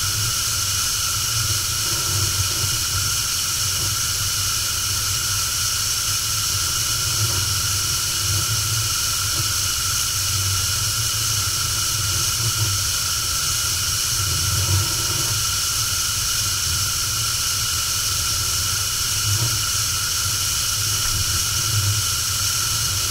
gas boiler running 01
Stereo recording of a running gas boiler in a small boiler room of a family house. Deep sound of gas combustion, whizzling, hissing... recorded from cca 0,4 m, unprocessed, recorded with: Sony PCM-D50, built-in mics in X-Y position.